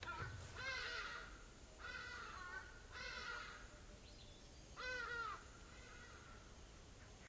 Hadeda Ibis flying overhead in Hluhluwe National Park, South Africa. Marantz PMD751, Vivanco EM35.